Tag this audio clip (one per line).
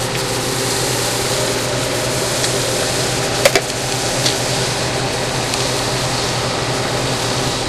store
interior
slurpy
machine
field-recording